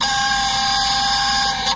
This is part of the sound of a Canon MV100 digital video camera opening and closing its Mini DV cassette door. Complex! Great Japanese engineering.
actuator, buzzing, electrical, electromechanics, motor, precision, robot, whir, whirring